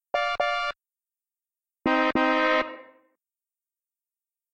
BusHorn LAURENPOND
Synthesized sound for a bus horn, or other vehicle!
beep, bus, car, city, horn